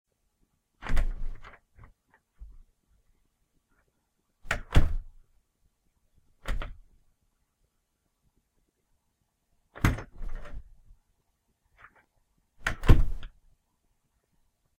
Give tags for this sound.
close; lock; bathroom; slam; shut; open; door

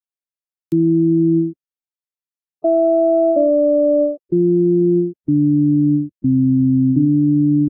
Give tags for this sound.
de; social; rs; office; kringskassan; psycle; fl; pengar; F; insurance

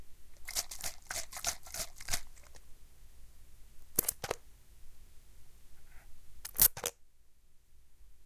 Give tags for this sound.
aip09; bottle; liquid; spray

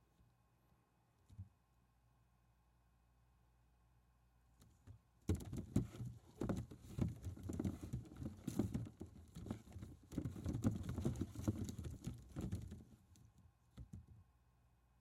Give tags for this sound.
Baseballs; bump; movement; Stirring; Thump

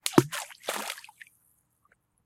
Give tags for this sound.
field-recording; nature; outdoors; rocks; water

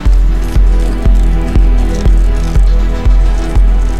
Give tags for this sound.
beats; loops; pads

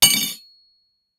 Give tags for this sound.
cutlery; dishes; Falling; fork; Hard; Hit; hits; Knife; knive; spoon

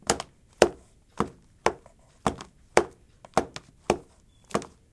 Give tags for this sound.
Essen; Germany; January2013; SonicSnaps

